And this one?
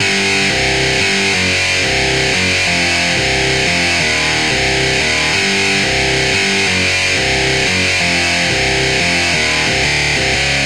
Distorted Synth Guitar 2 C 90BPM
Heavy distorted guitar synth chords. Created by adding a Kontakt Guitar Rig plugin to Logic Pro's Classic Electric Piano preset.
C, Key-of-C, chords, distorted, elecric-piano, guitar, heavy, loop, loops, metal, music, riff, rock, synth, synthesized